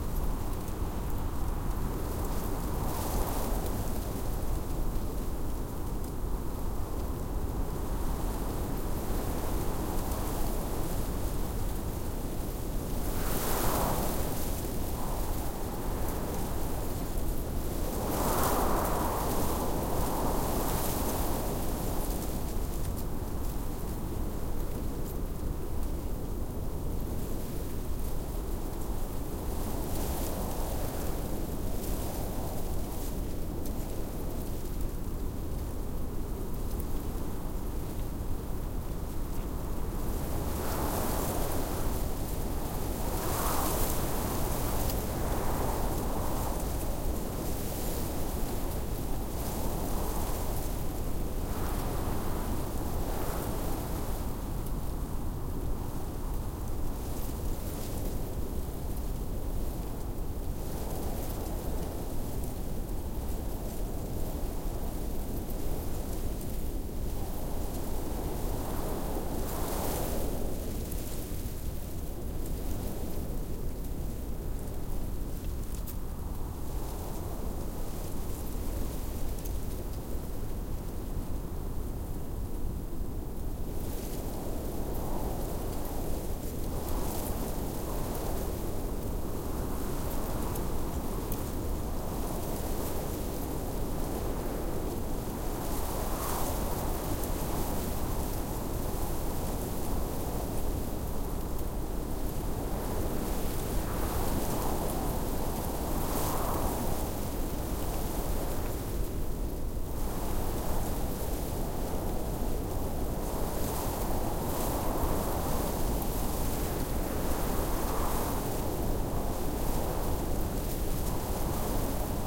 wind medium blustery whips through grass stalks
blustery, grass, medium, stalks, through, whips, wind